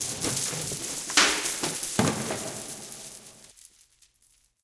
Marley Shake Chains 1
Marley shakes his chains!
Recorded for the Stormy Weather Players' production of Dickens' "A Christmas Carol".